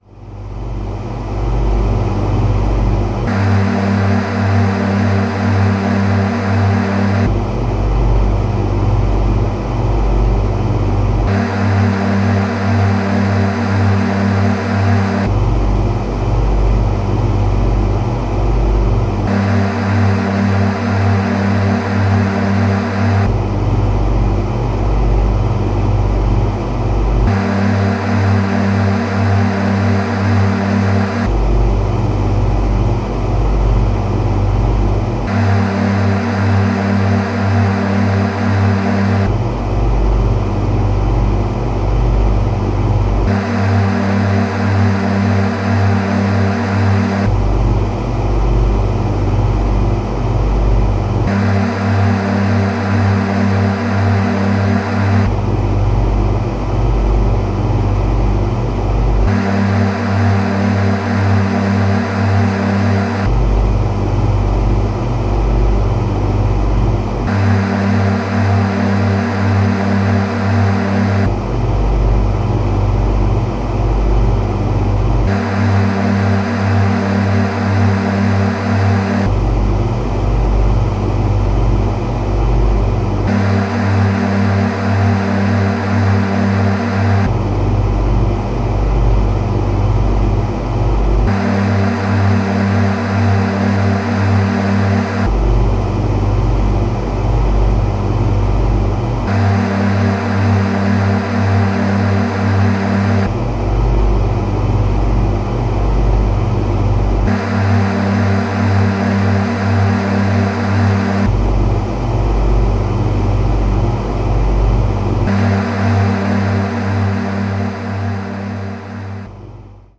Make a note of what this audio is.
1.This sample is part of the "Padrones" sample pack. 2 minutes of pure ambient droning soundscape. Slow melodies, a touch of darkness.